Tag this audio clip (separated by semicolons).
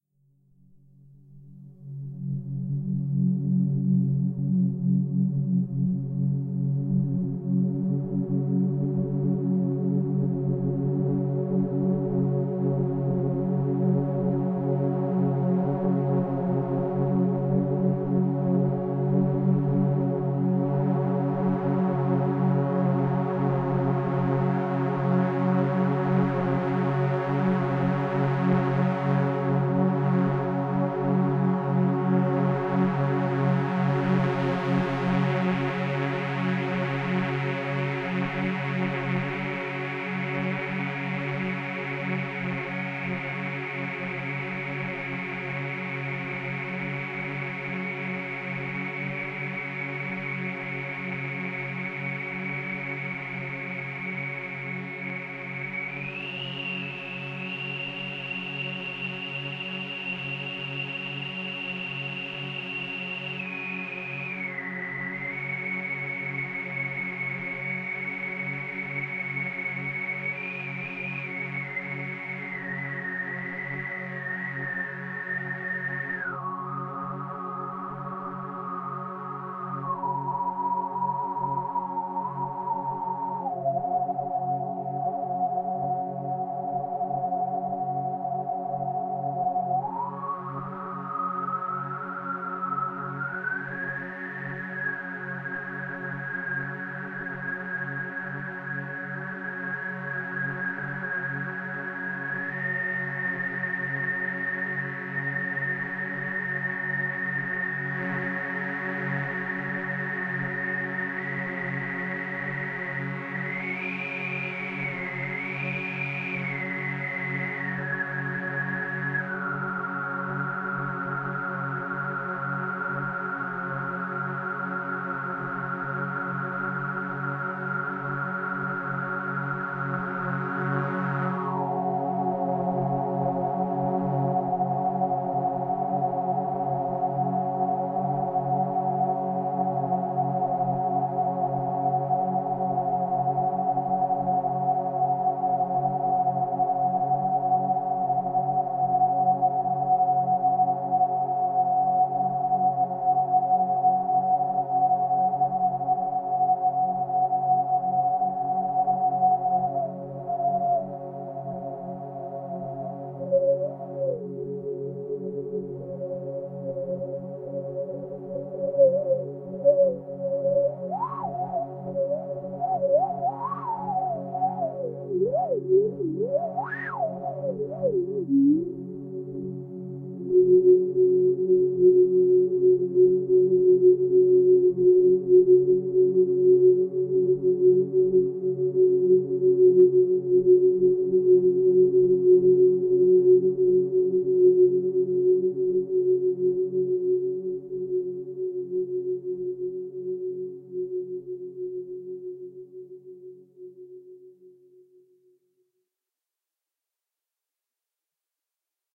soundscape; drone; pad; experimental; ambient